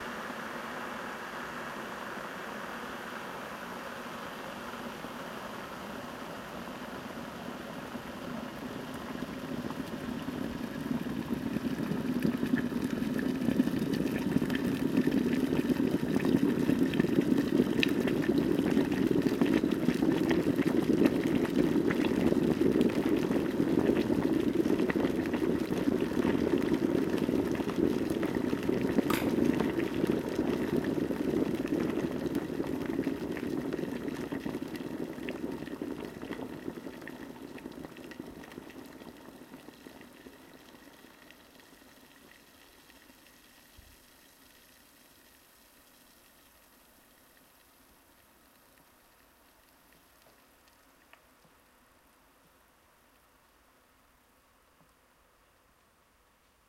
Boiling water in a water boiler, including automatic switch-off.
Recorded with Zoom H1 (internal mics).